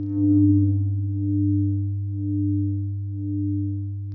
modified dtmf tones, great for building new background or lead sounds in idm, glitch or electronica.